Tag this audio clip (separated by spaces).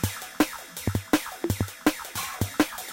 amen drumloop light